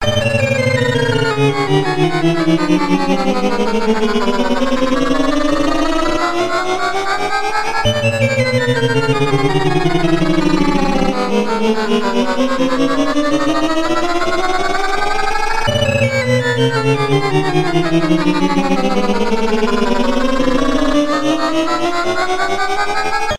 Made with a synthesizer. Feel like falling.